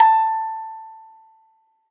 metal cracktoy crank-toy toy childs-toy musicbox
childs-toy, cracktoy, crank-toy, metal, musicbox, toy